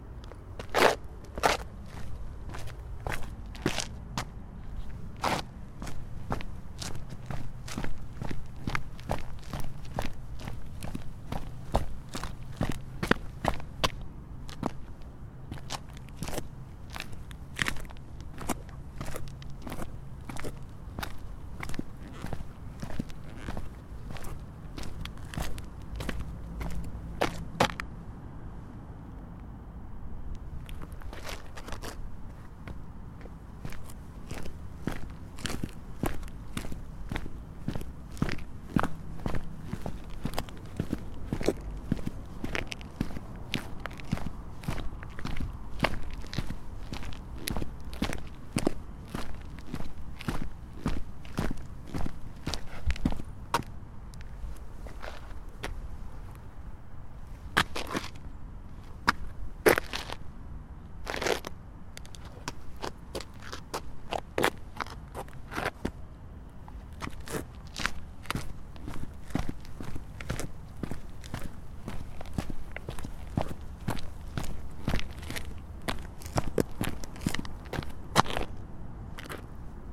Walking on pavement with sport shoes